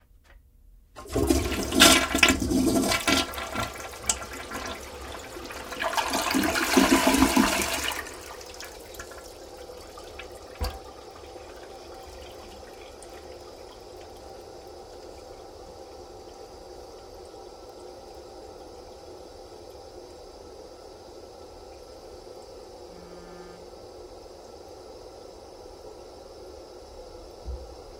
Shower Water Running Drip Toilet